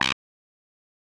Synth Bass 025
A collection of Samples, sampled from the Nord Lead.
bass, lead, nord, synth